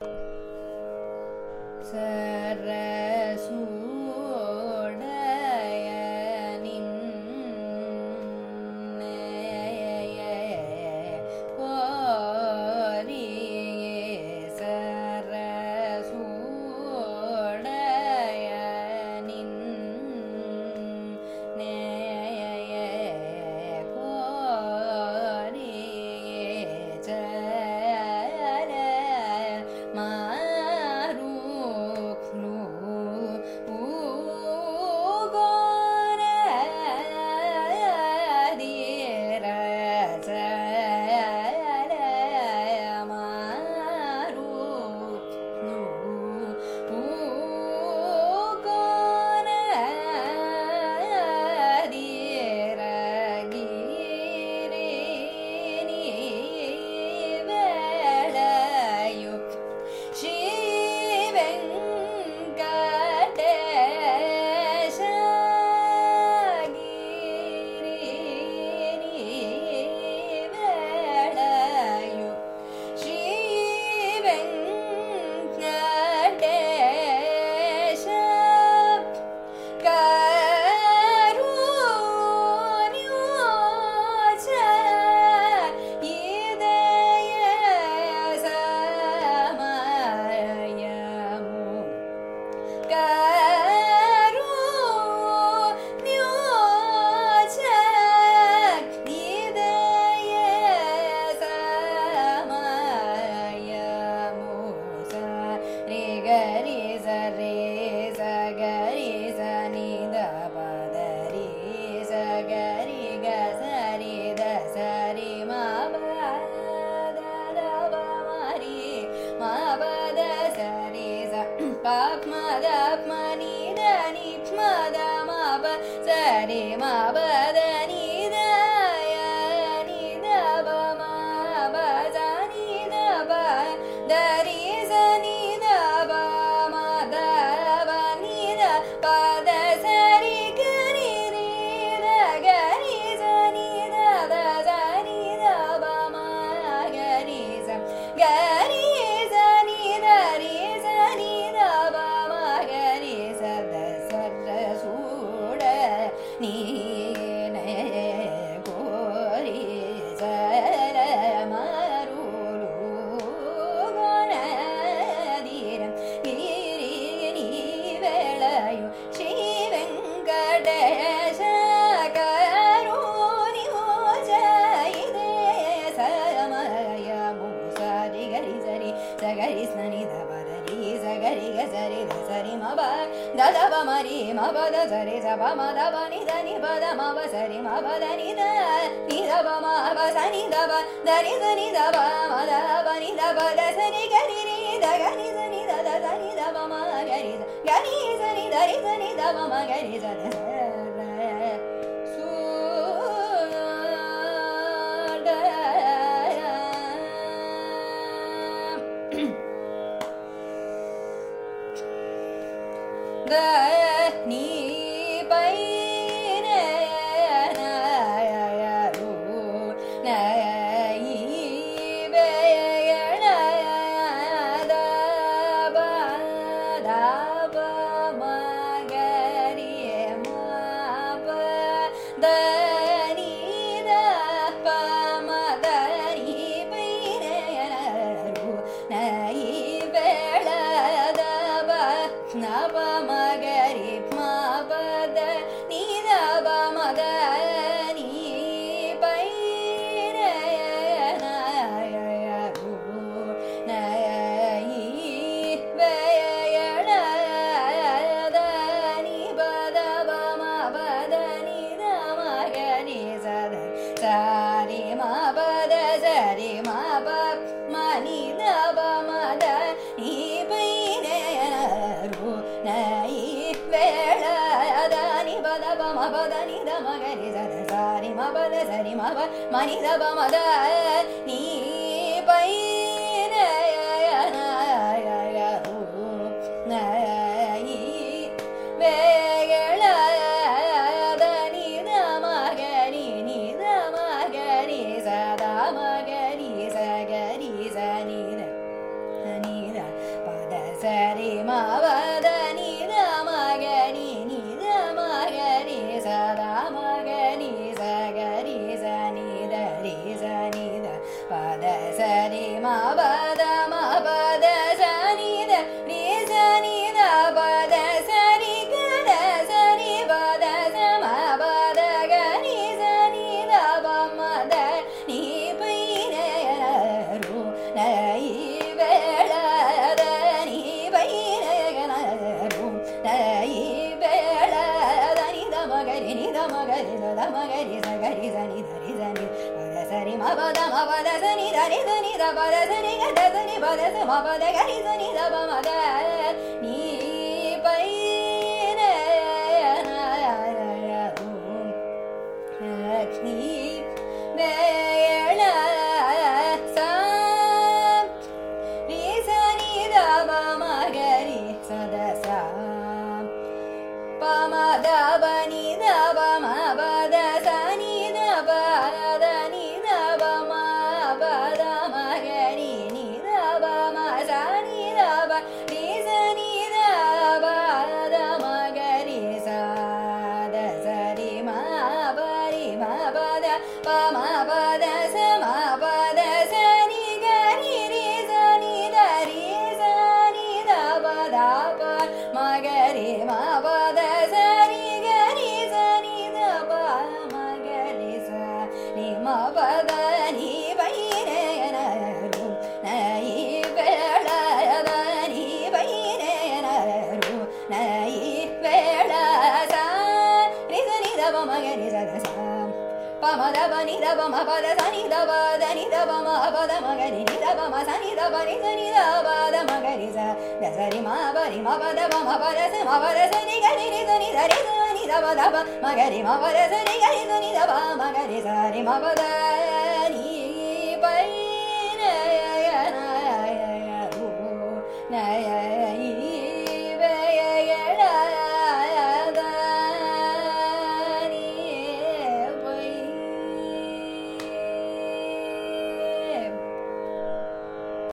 Varnam is a compositional form of Carnatic music, rich in melodic nuances. This is a recording of a varnam, titled Sarasuda Ninne Kori, composed by Kotthavaasal Venkatrama Iyer in Saveri raaga, set to Adi taala. It is sung by Sreevidya, a young Carnatic vocalist from Chennai, India.